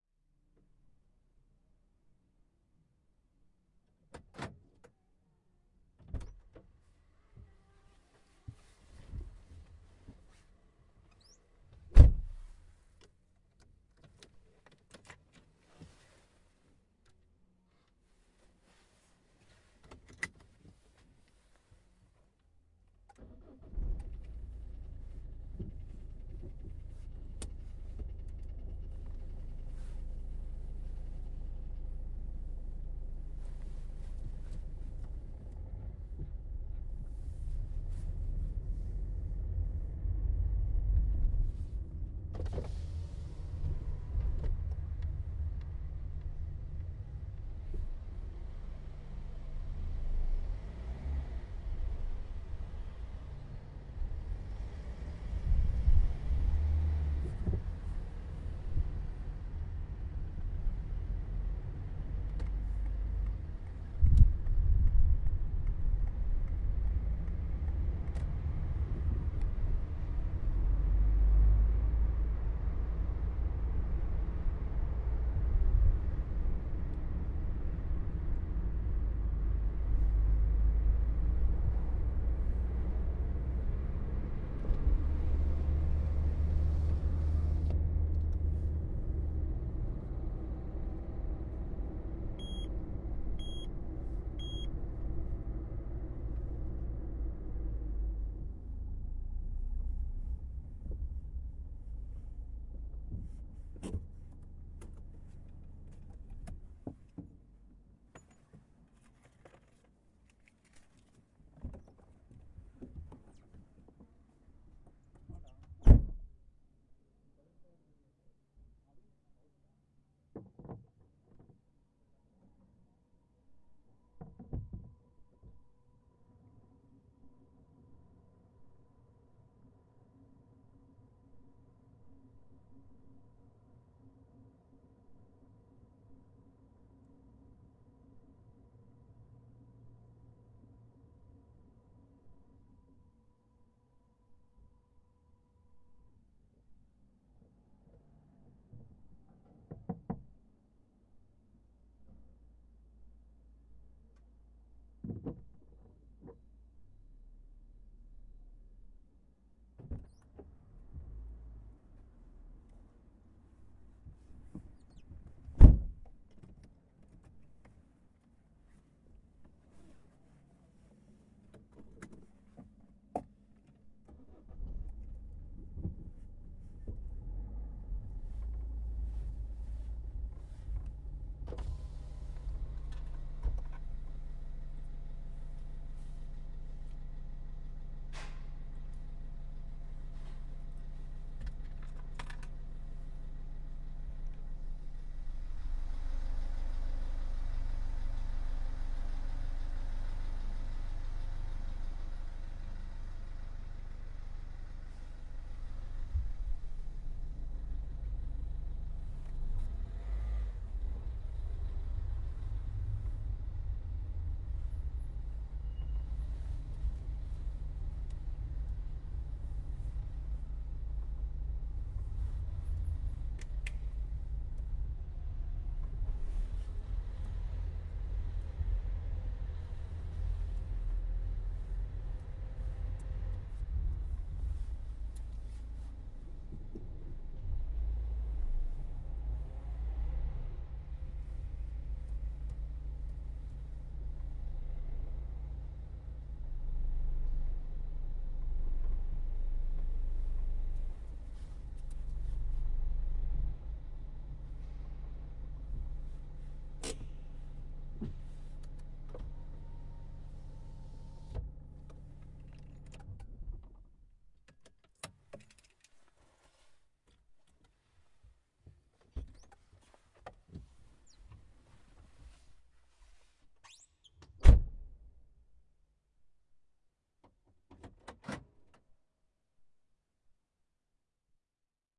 Paisaje Sonoro - Coche en movimiento
Sound from inside the car, someone comes and starts driving, drives a little, open the windows, start the alarm alert of the gasoline finishing, go to the gas station and then goes to the garage to park it off and closed.
alerta, aparcar, arranca, cierra, coche, conduce, garaje, gasolina, gasolinera, movimiento, paisaje, sonoro, ventanillas